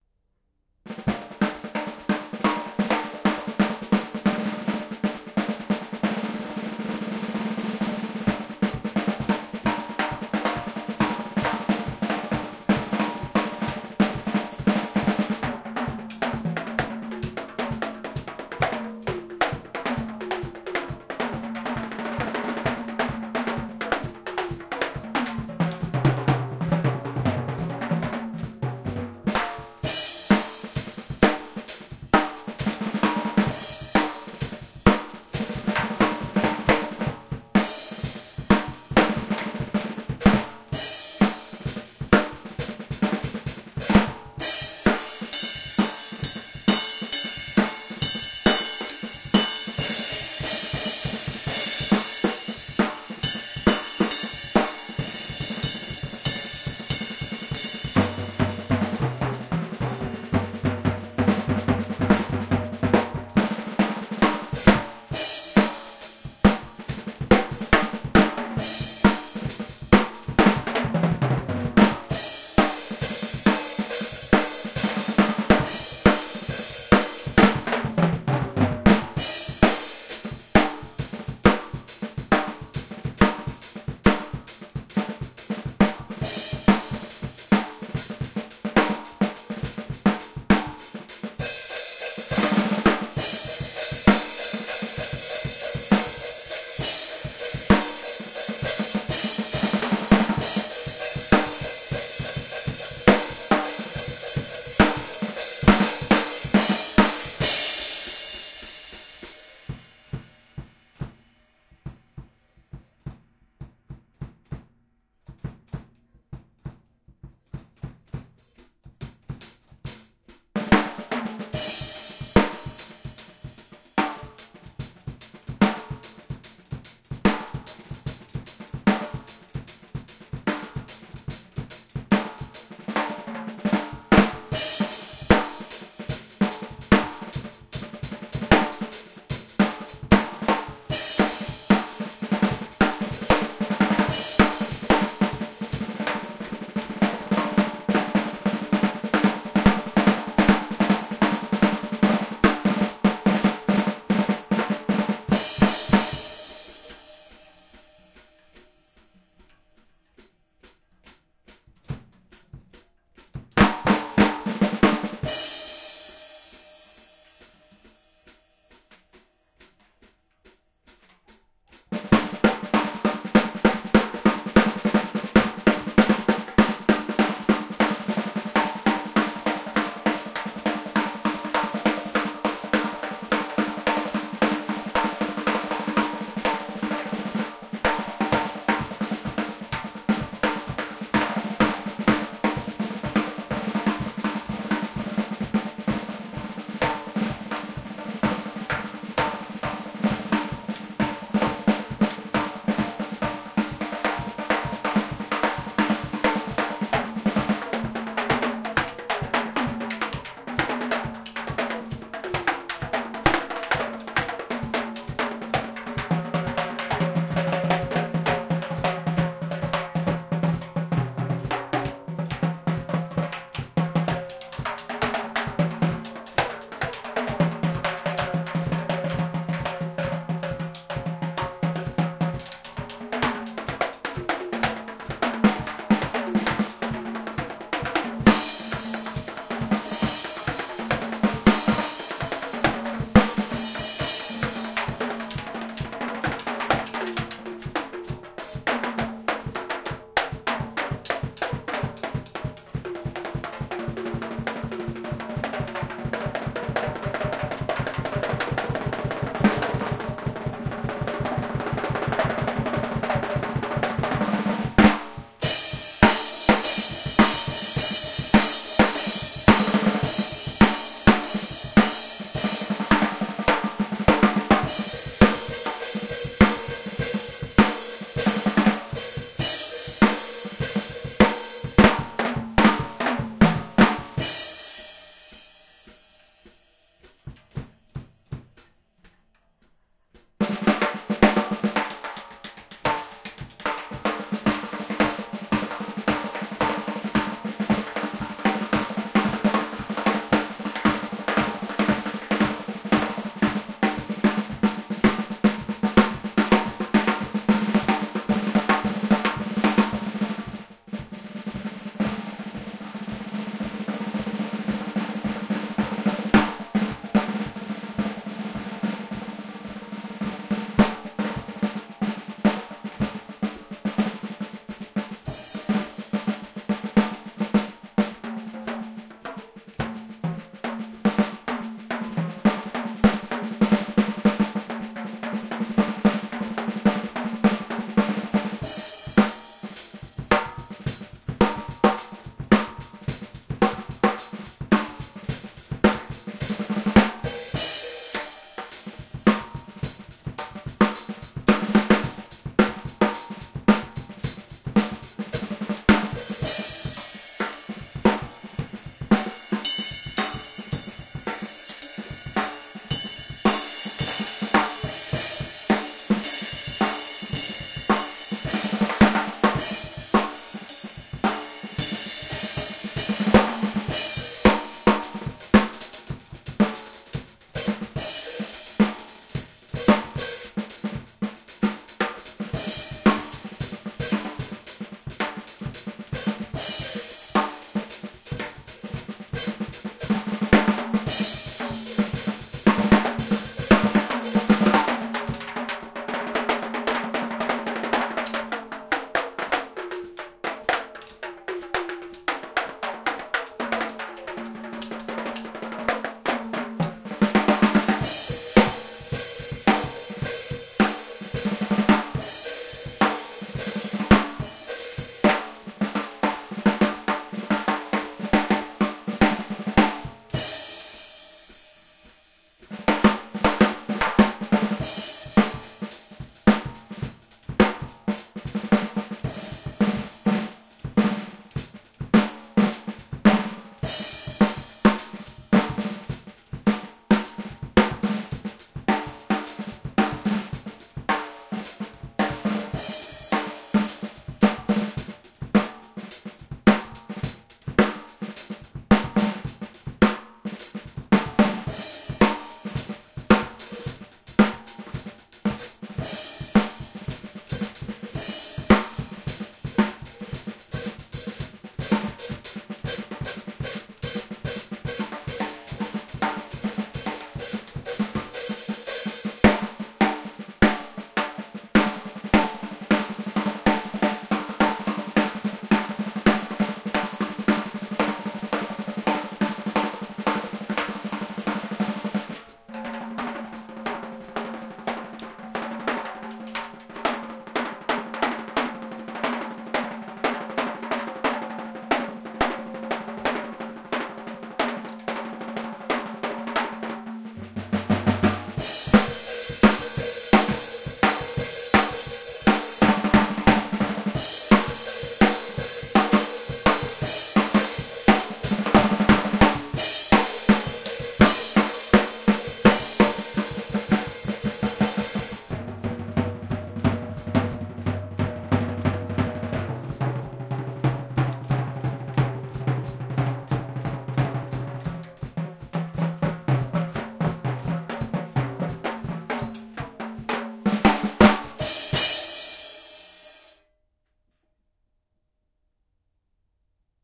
This is a recording I ade of my drum kit back in 2014 after receiving a new snare drum. Note that the head that came on the snare has since been replaced, so a new recording may be in order at some point.
sound-demonstration, binaural, music, drum-kit, percussion, improv